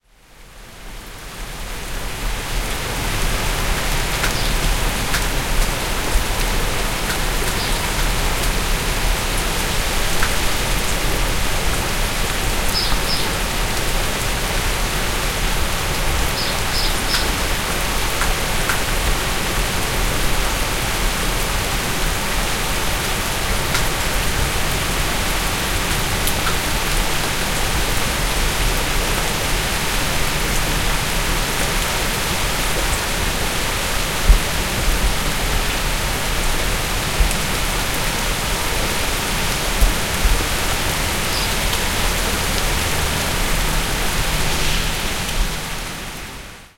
Sound of rain in a French street. Sound recorded with a ZOOM H4N Pro and a Rycote Mini Wind Screen.
Son de pluie dans une rue française. Son enregistré avec un ZOOM H4N Pro et une bonnette Rycote Mini Wind Screen.